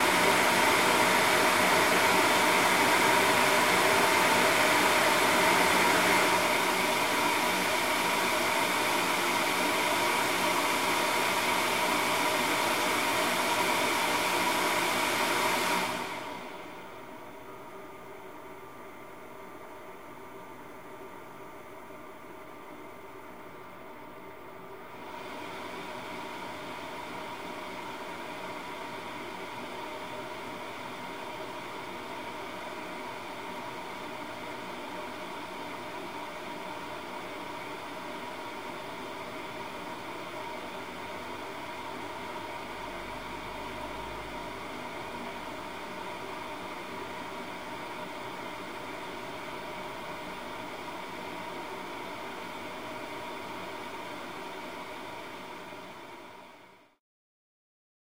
SERVER FANS AT START SLOWING DOWN
Serverroom server fans slowing down fan computer noise
Serverroom, slowing, fan, noise, computer, down, fans, server